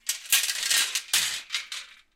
SFX-metal-003
Metal object recorded in a cellar.